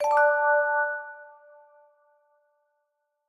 Positive response
This sound is made in Reason 4.0 in Estonia. It is perfect sound to complete a test or accomplishment in positive way.
computergame, feedback